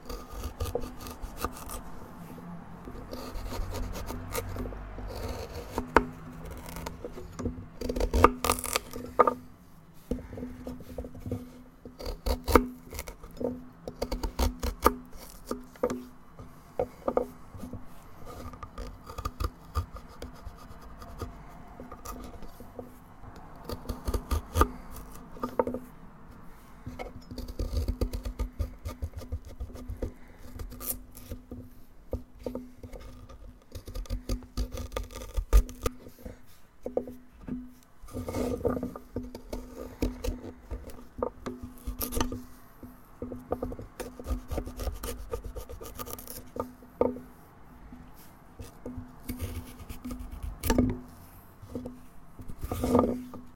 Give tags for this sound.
carrots chop chopping cut cutting SFX slicing tearing

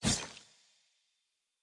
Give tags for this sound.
big-sword blade blood-hit cut-flesh game game-fx gore heavy-hit hit impact knife metal swing sword sword-hit sword-impact sword-swing video-game

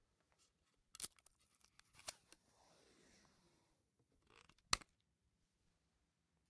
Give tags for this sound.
pull rip tape tape-dispenser tear

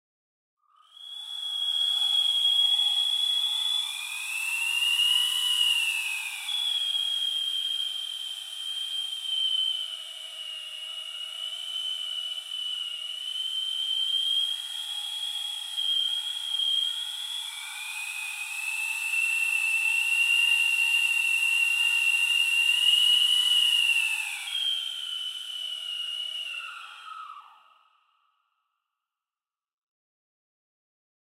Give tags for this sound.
screaming howling air tea-kettle whistling wind

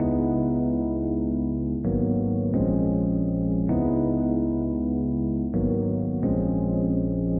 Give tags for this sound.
chords ukg

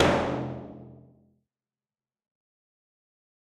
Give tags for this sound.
pauke percs drums one-shot dry timp percussion orchestra HQ percussive timpani orchestral hit drum stereo acoustic

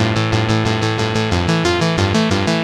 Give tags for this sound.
91 bpm loop synth

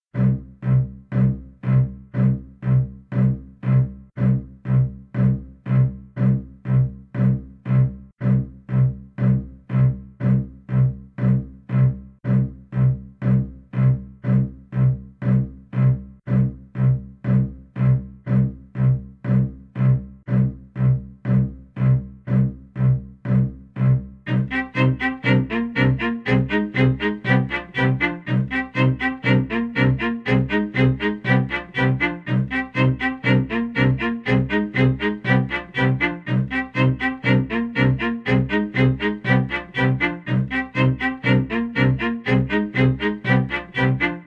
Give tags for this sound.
anxious atmos background background-sound

